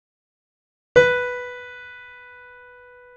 Note "Si" played by a piano (I think it's "Ti" in english)